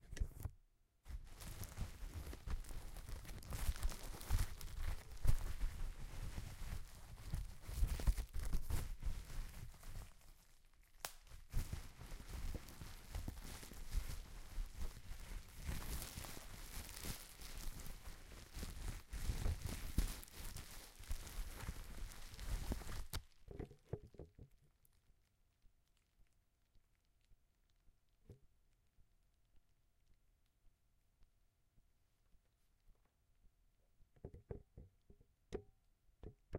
Rolling on paper?
crumple, unknown, paper